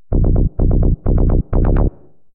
Techno Basslines 010
Made using audacity and Fl Studio 11 / Bassline 128BPM